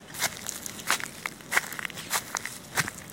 Walking on grass
forest, garden, grass, leafs, legs, tree, walk, walking